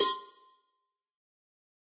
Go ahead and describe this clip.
Cowbell of God Tube Lower 026
god, metalic, cowbell, home, trash, record